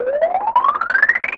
xilo-bones
Computer FX sounds like kid cymbals